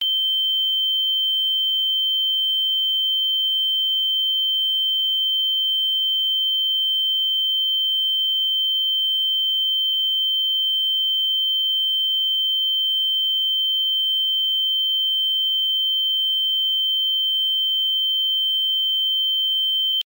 tinnitus ringing ears hearing impaired impairment ear white noise sound
impairment, ear, ears, sound, white, impaired, noise, ringing, tinnitus, hearing